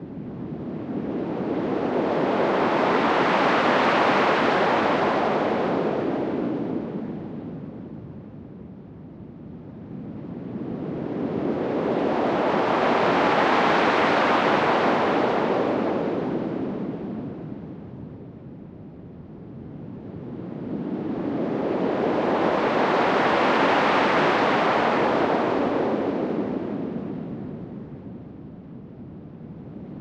Synthesized Ocean Waves / Wind
I synthesized this by making white noise, then applying a bandpass filter, and finally applying a wah-wah filter thing at a very low freq. in audacity.